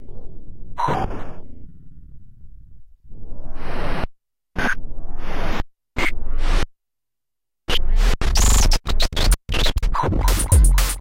Silly Drums
beats, dnb, drums, house, lockers, processed, stuff